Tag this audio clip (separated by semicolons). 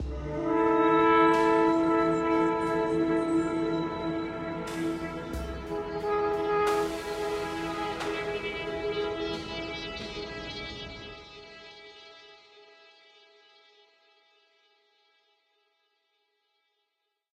chill; drone; drones; fragment; game; game-music; music; non-linear; non-linear-music; static; suspense; synthesized; synthesizer